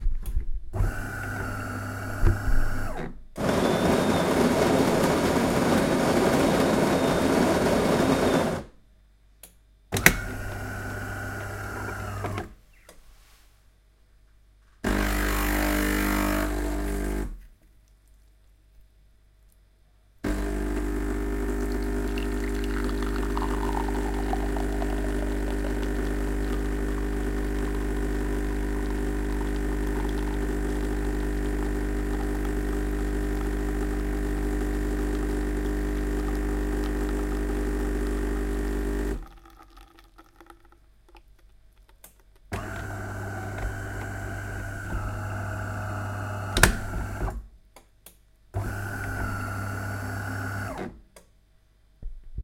Espresso Machine (Automatic)
Recording of an espresso machine from my office. Unedited. Shot with a Zoom H1.
machine, brew, coffee, espresso, expresso, maker